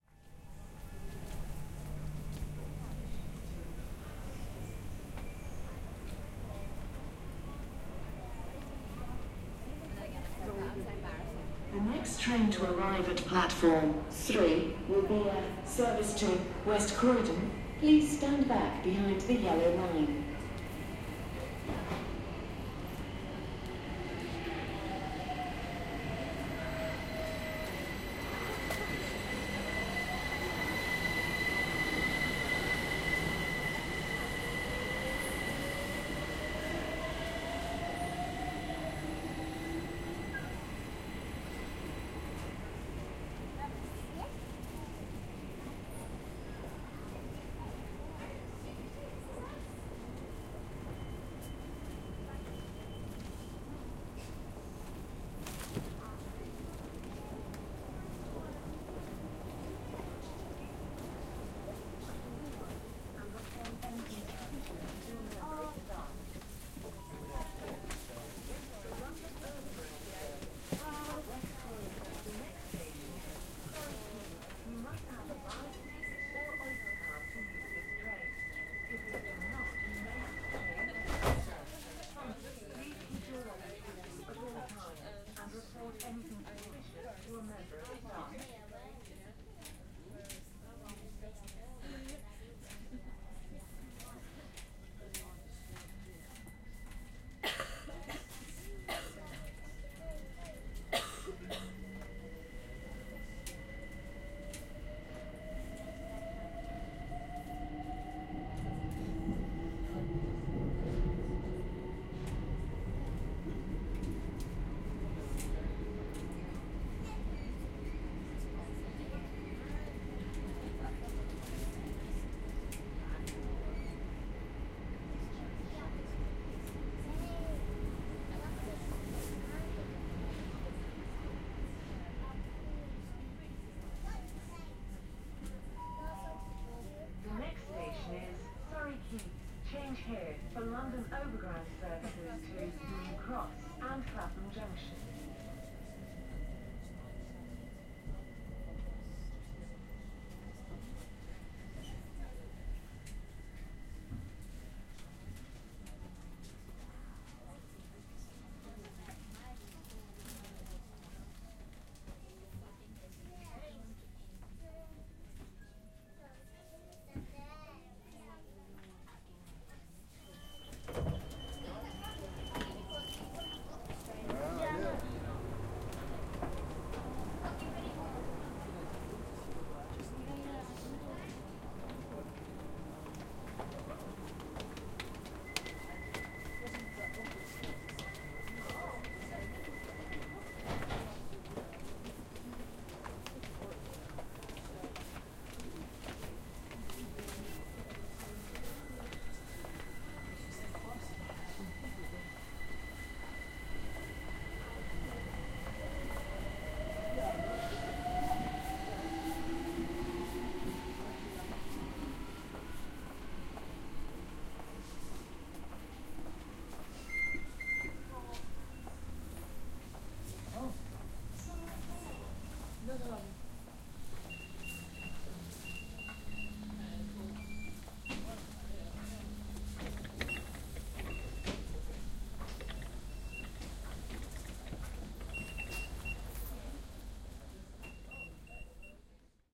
London Overground - Canada Water to Surrey Quays
London overground ambience from Canada Water to Surrey Quays.
Recorded with a Zoom h4n, 90º stereo on April 2014.
announcement, canada-water, london, london-overground, london-underground, metro, subway, surrey-quays, train, tube, underground, urban-recording, zoom-h4n